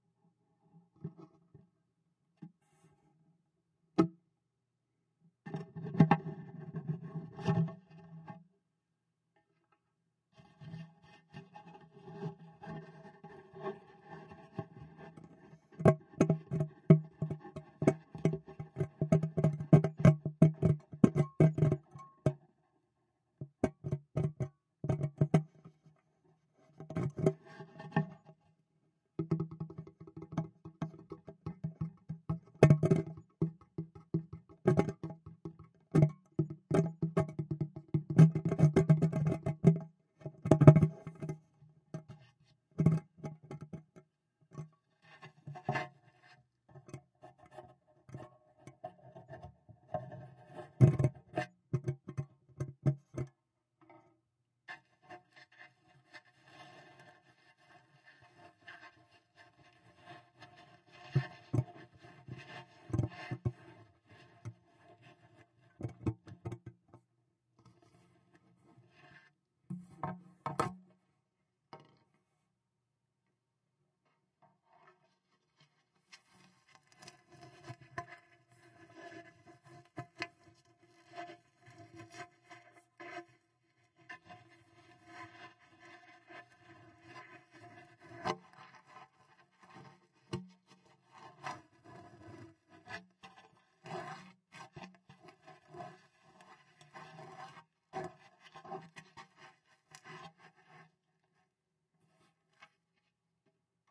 Selfmade tools where the microphone is placed into it. Mics Studio Projects S4 and RAMSA S1 (Panasonic). Record direct into Cubase4 with vst3 GATE, COMPRESSOR and LIMITER. Samples are not edit. Used pvc pipes, guitar strings, balloon, rubber, spring etc.